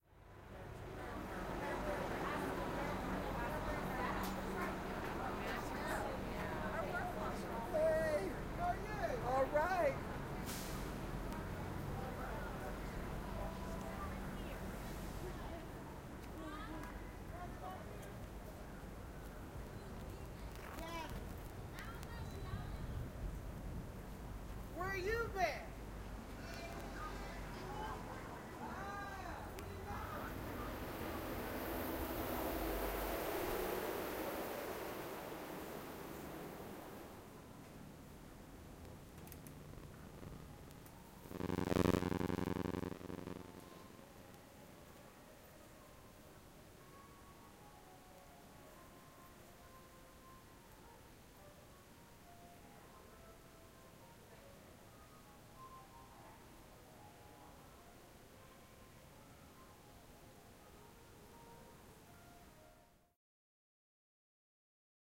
Binaural recording of entering Macy's from street in downtown Pittsburgh. Noise of FR sensor interfering with microphones when entering store. Home-made binaural microphone.